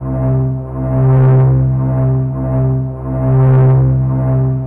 Sci Fi portal
A sound loop I made with FL Studio 11, used it in a game as the sound a of a teleport portal.
loop, portal, science-fiction, sci-fi, space, stargate, teleport